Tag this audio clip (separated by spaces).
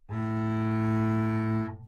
A2 double-bass good-sounds multisample neumann-U87 single-note